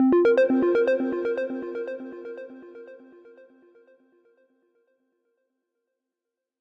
alert1 : (50% distortion)
Ascending synth jingle, usefull as an alert in your game or app.
alert, app, beep, beeps, bleeps, computer, design, game, game-sfx, gui, interface, jingle, menu, modern, musicall, notification, ui, up, warning